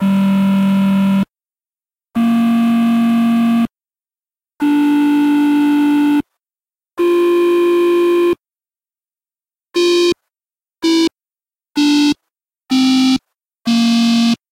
Subosc+Saro 023
Another set of monotron dirty beeps. Processed by a virtual signal chain. The sound is not completly stable and there is some texture to each beep.
The headphones output from the monotron was fed into the mic input on my laptop soundcard. The sound was frequency split with the lower frequencies triggering a Tracker (free VST effect from mda @ smartelectronix, tuned as a suboscillator).
I think for this one also the higher frequencies were fed to Saro (a free VST amp sim by antti @ smartelectronix).
antti, beep, bleep, distortion, electronic, korg, mda, monotron-duo, overdrive, saro, smartelectronix, tracker